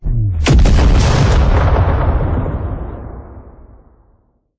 Sci-Fi Explosion 2
Sci-Fi explosion for gun or other effect.
The Effect is created in Adobe Audition 2019 CC.
The source sound was a bomb explosion, which can be found in free access on the Internet without any rights.
Added effects distortion and Sci-Fi style.